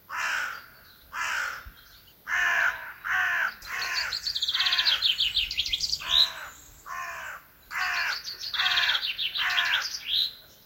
Cawing crows and other birds. Recorded with mobile phone.
cawing, nature, crows, birdsong, caw, raven, birds, crow